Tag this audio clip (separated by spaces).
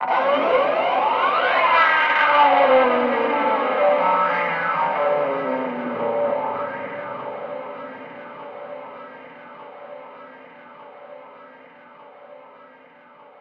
Ambient Drone Fx Guitar